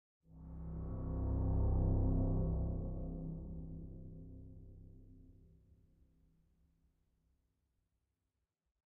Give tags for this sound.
angry-boat; BRRRRRRRRRRRRM; distortion; epic; horn; Inception; metallic; movie; movie-trailer; ominous; Prometheus; reverb; Shutter-Island; strings; submerged; tension; trailer-music; Transformers

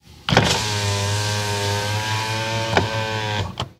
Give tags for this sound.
auto; down; servo; window

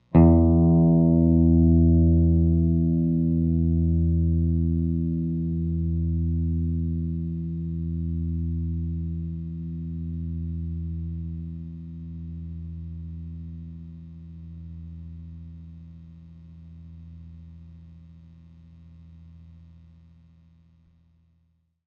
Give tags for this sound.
guitar; squire; sample; string; electric; jaguar; note

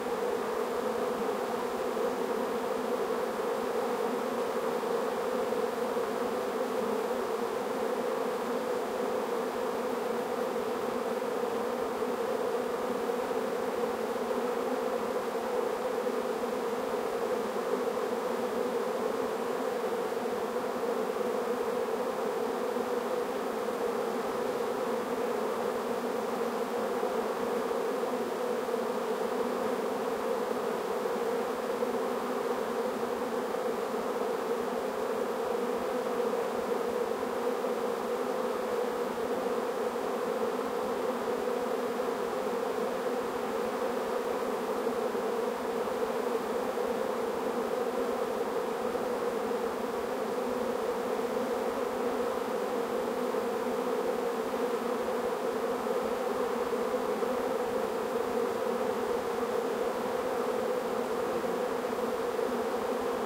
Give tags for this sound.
animal; animals; bee; bees; hive; insect; insects; monster; natural; nature; swarm; wasp

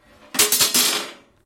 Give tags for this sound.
Plastic; Steel

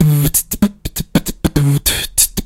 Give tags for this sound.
beatbox; rhythm; Shuffle

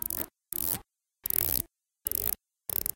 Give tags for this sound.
Essen; School; Germany; SonicSnaps

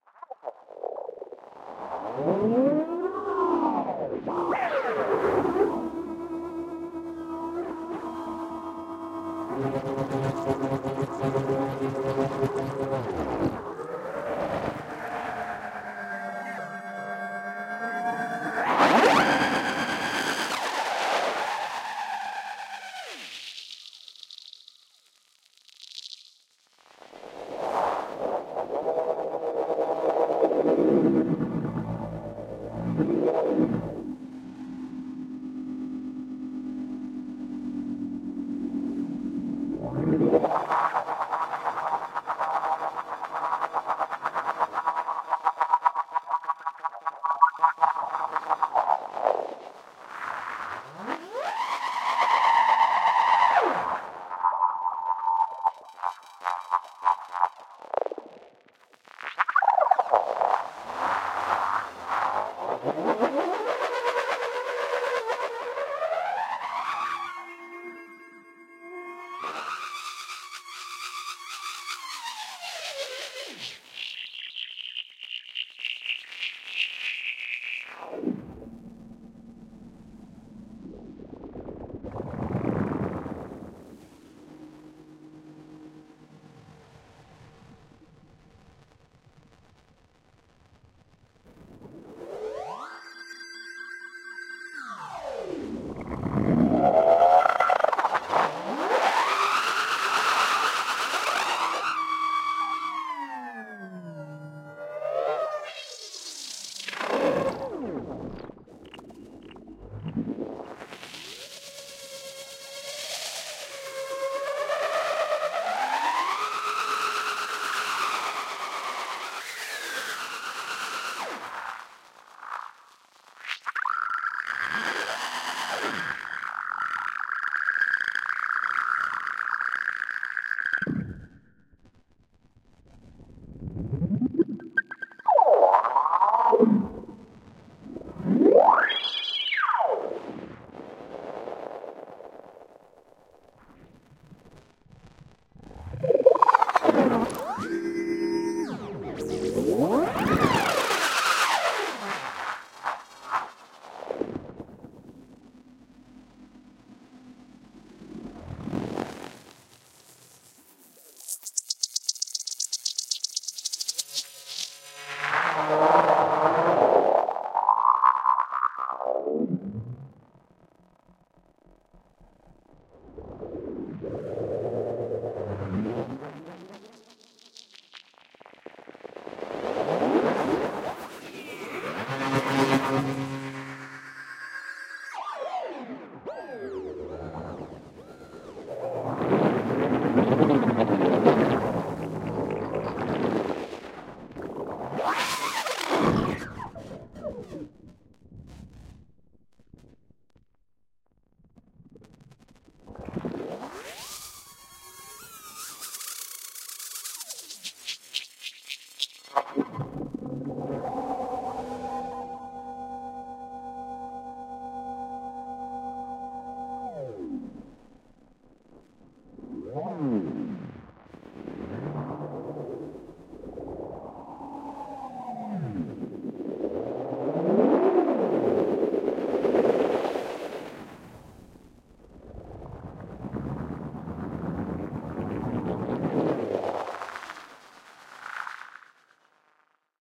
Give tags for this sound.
electronic; effect; drone; granular; space; soundscape; reaktor

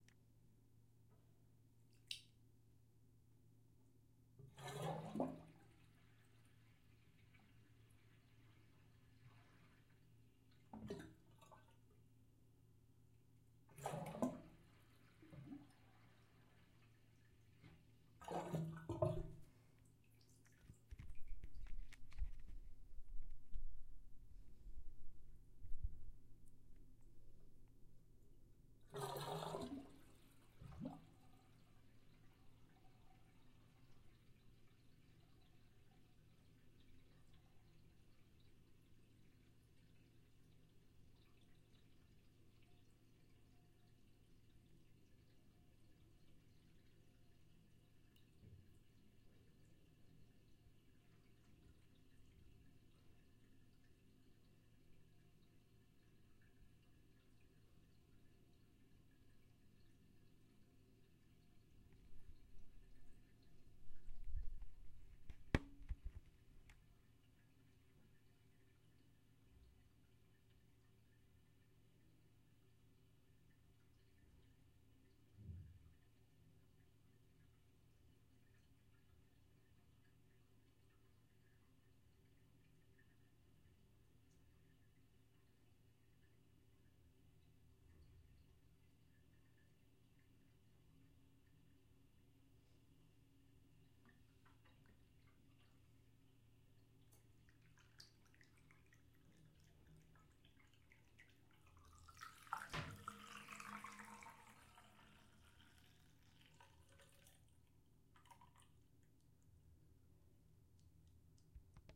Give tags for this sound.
Draining; Stopper; Tub; Water

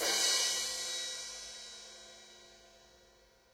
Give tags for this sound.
crash-cymbal; 1-shot; drums; stereo; splash; crash; DD2012; mid-sidepercussion

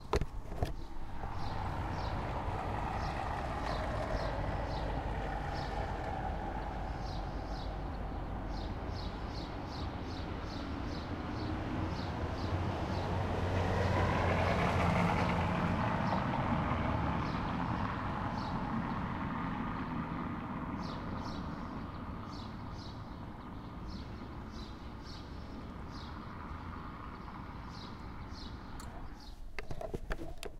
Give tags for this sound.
noise; urban; car; surrounding; capitol; ambient; city; berlin

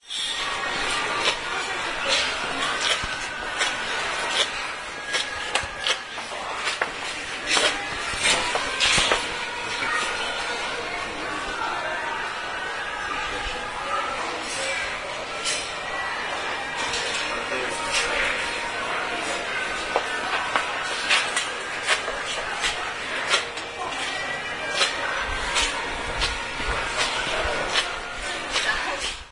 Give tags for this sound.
creaking; field-recording; poland; shoes

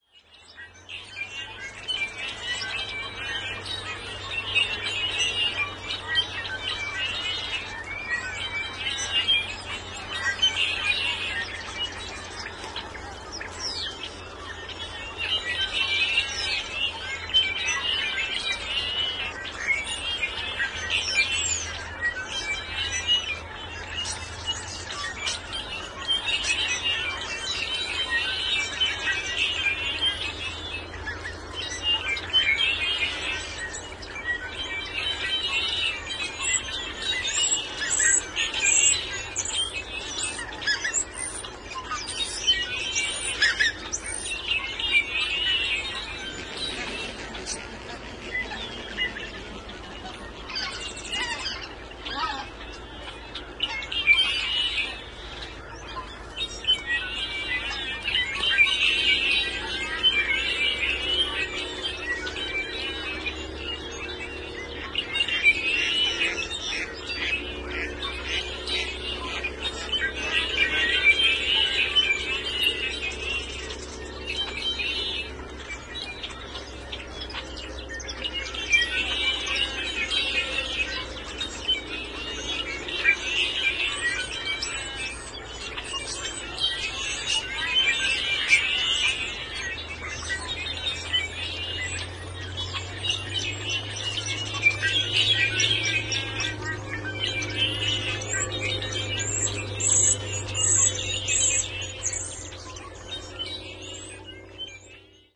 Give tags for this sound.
california; blackbirds; sherman-island

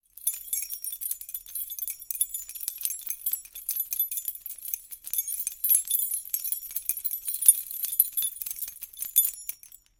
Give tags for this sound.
0; chimes; egoless; key; natural; shaking; sounds; vol

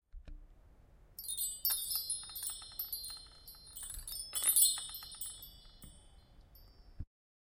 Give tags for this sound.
chimes; metal; percussion

